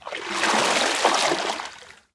Water slosh spashing-4
environmental-sounds-research, splash